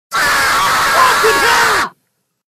A really big Loud scream!
Loud, Noisy, Scream